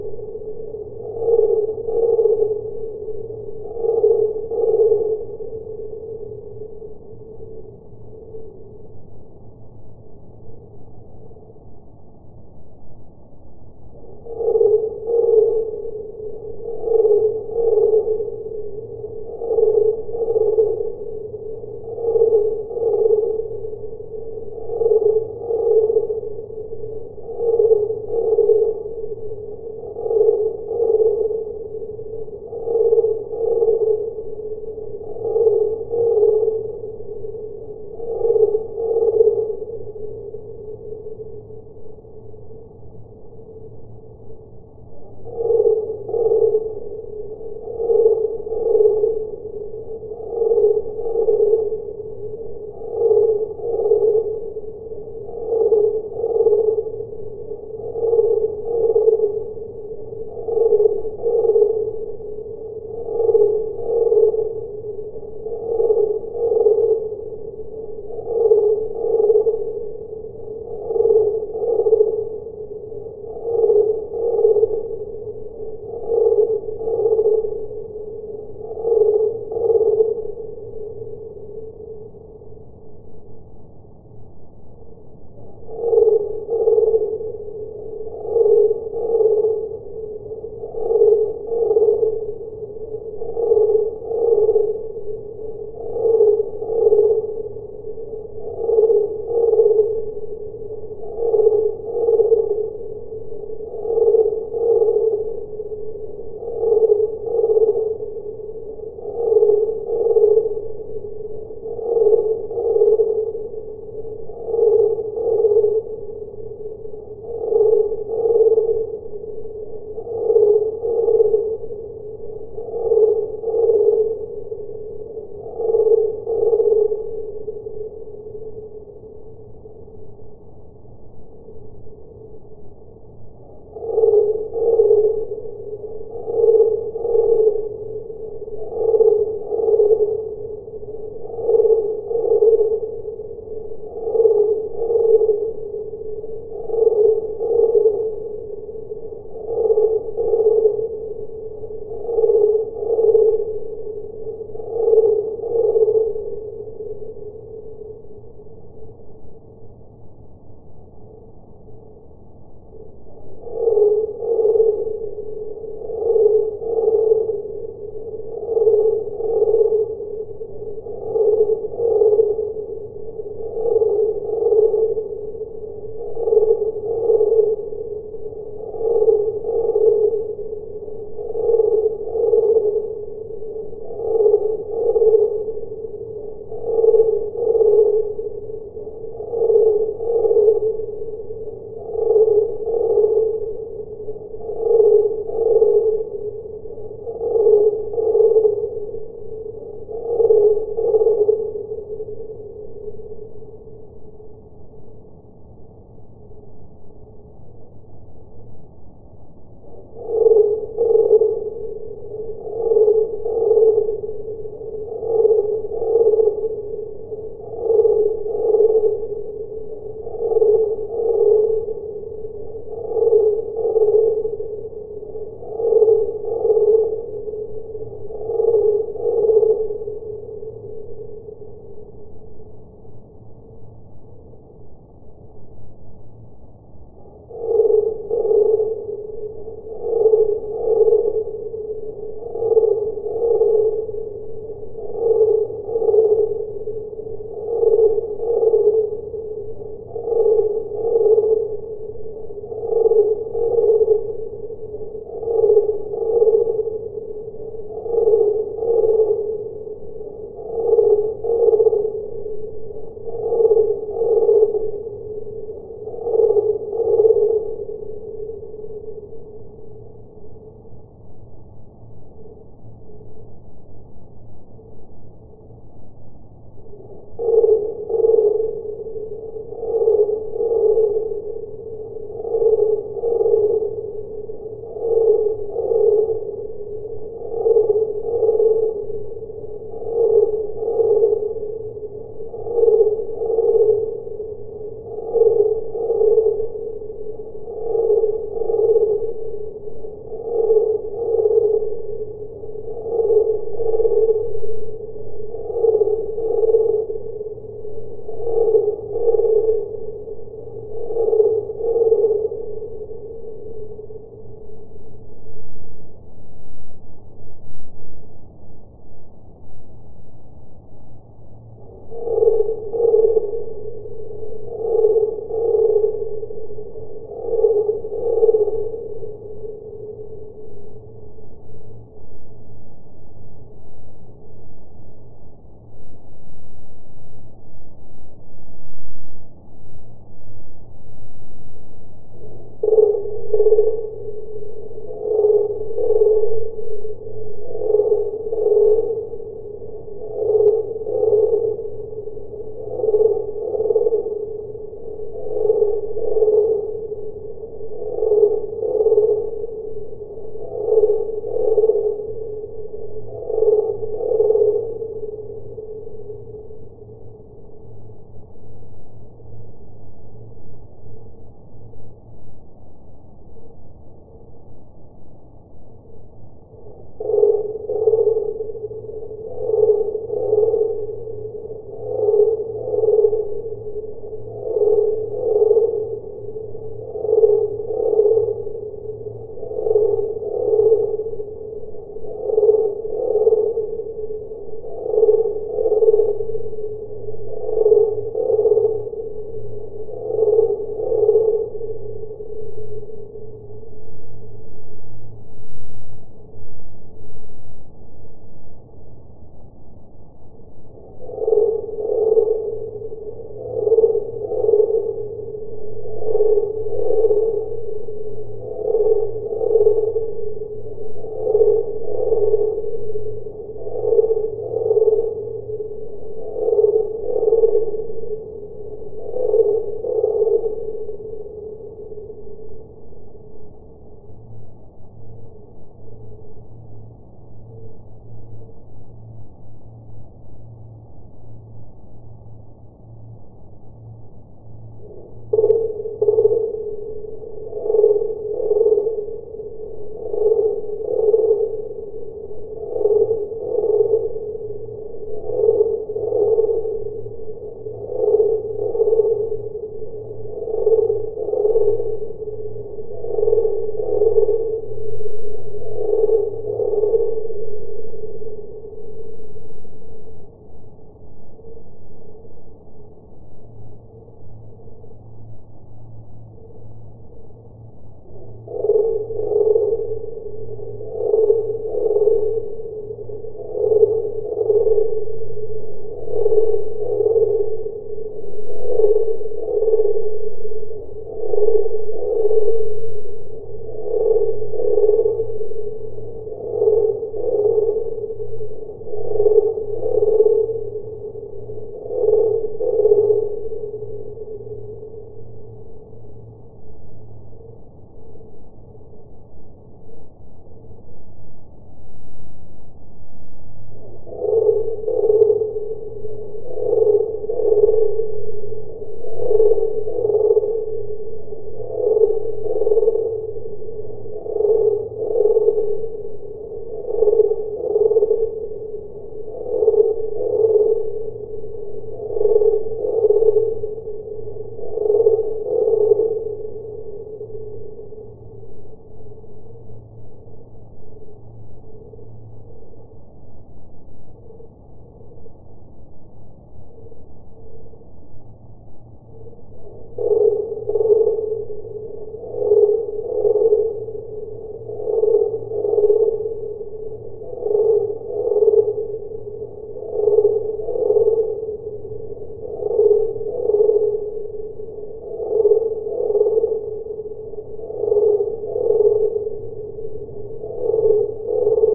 This is a 55cent (halftones) downpitched version of the SEP-10-2021-Cricket-Datablocks cricket recording. Like you take an audio tape and just slow the playback down. Not FFT transposed, just played very slow. No effects were added.
I was absolutely puzzled that the cricket sound seems to have some sort of reverb and delay in it given the transformed time-domain (that we normally do not recognize at the frequencies we can barely hear). It seems the short zz-zz-zz-zzzt we hear from a group of tiny insects who seem to be in perfect sync travels a very long way. (I guess they were 10-20 meters away)